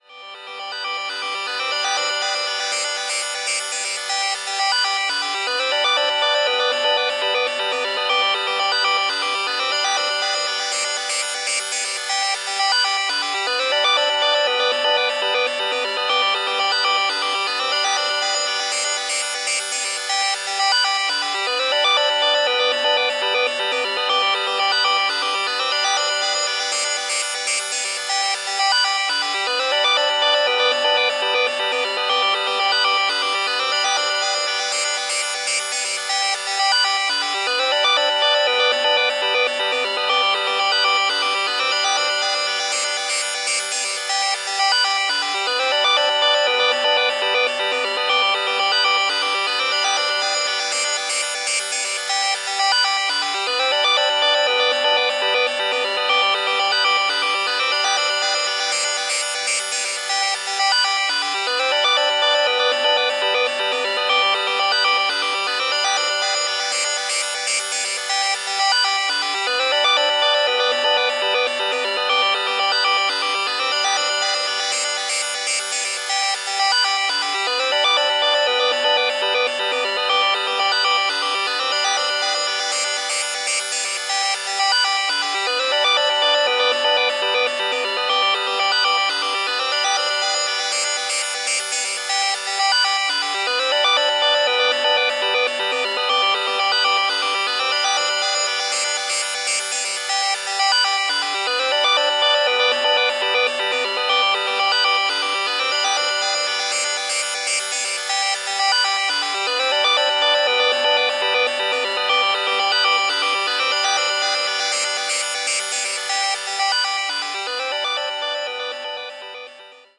1.This sample is part of the "Padrones" sample pack. 2 minutes of pure ambient droning soundscape. Lovely arpeggiated melody.
ambient, drone, effect, electronic, reaktor, soundscape